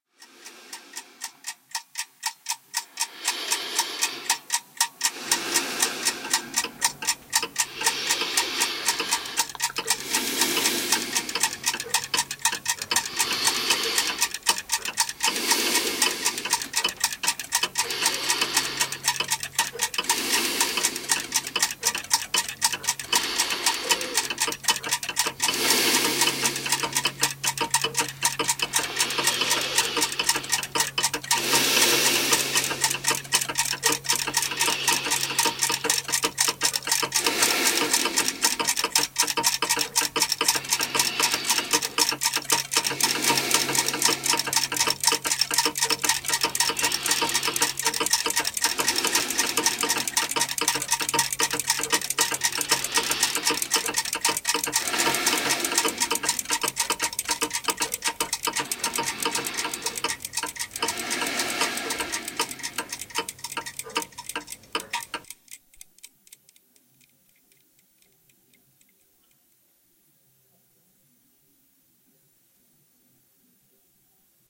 20090405.tictac.breathing.mix
mixed several of my clock tictac sounds to get an effect of ... going crazy, I guess.
machine, tac